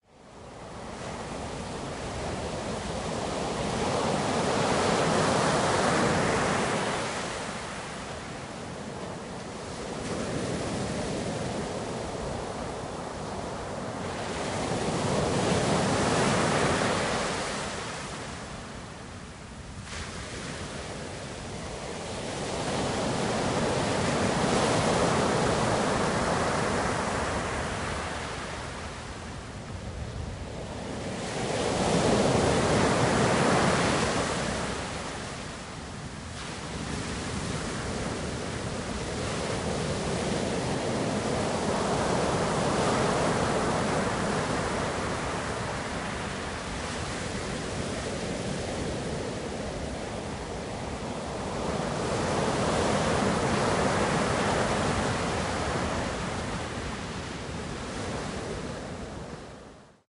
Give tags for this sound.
beaches California field-recording ocean water USA waves pacific-ocean

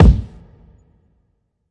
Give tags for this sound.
kick free sound effects

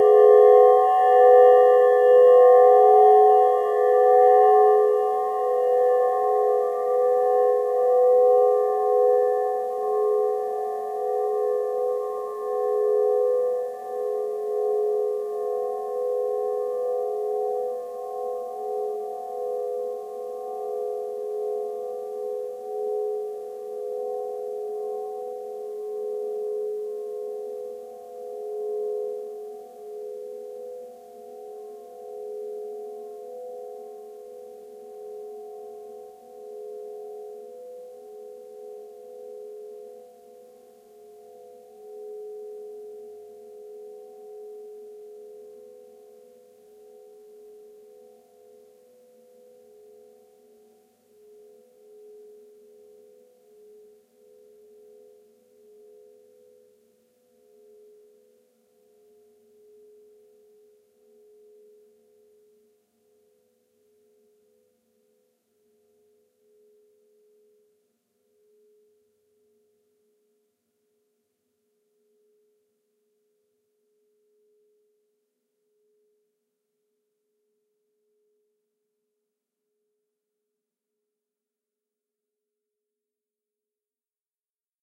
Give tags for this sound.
bell bowl cinematic ding dong ging glass gong meditation ping reverb ring ringing singing singing-bowl tibetan tibetan-singing-bowl

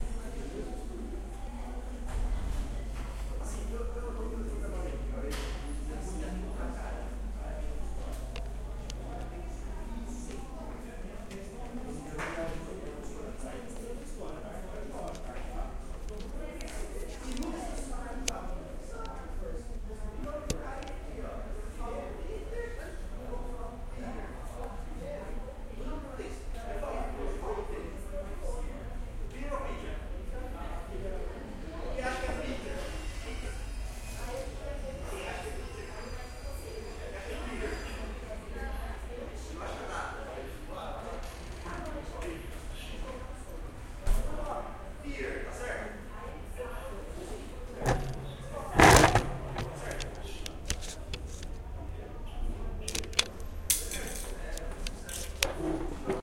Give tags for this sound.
city construction street traffic

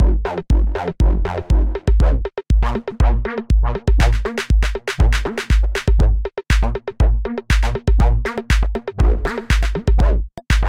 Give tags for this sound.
Complex Vocal-like Beat formant Filter

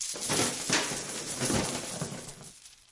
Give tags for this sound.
chains rattle shake

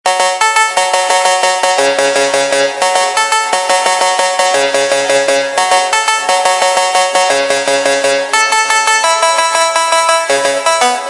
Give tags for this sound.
synth trance

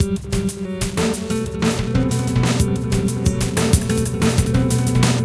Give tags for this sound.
electronica glitch experimental sliced hardcore breakbeat extreme drums electro acid

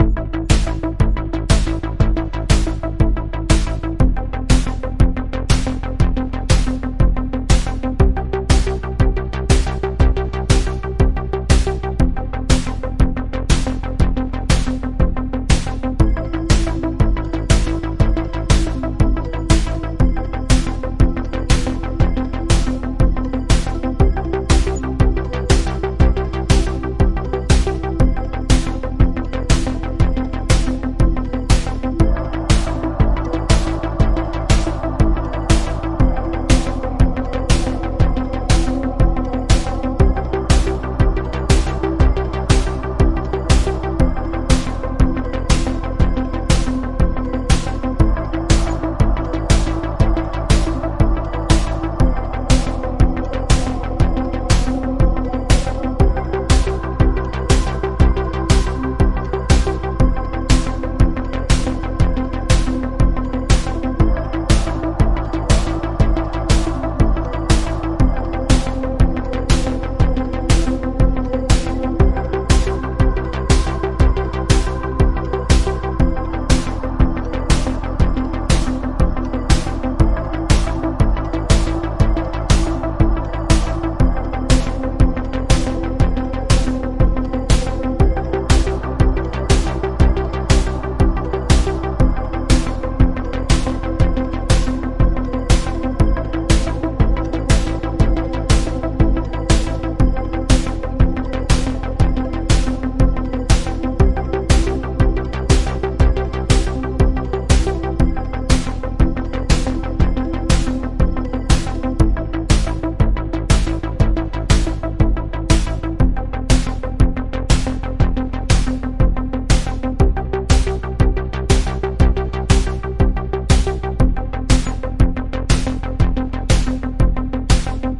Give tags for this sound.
pop-synth beat effect pop loop tecno abstract track electronic soundeffect digital original

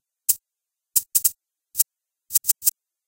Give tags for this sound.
deep loop tech

